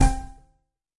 STAB 009 mastered 16 bit
An electronic percussive sound. Created with Metaphysical Function from Native
Instruments. Further edited using Cubase SX and mastered using Wavelab.
electronic, percussion